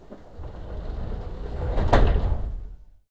Budapest Metro line 2. The doors are closing. A part of a high quality recording, made by my MP3 player.